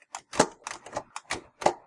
The latch door from an old coin-operated washing machine being closed. Series of instances.
door, dryer, mechanical, slam, washing-machine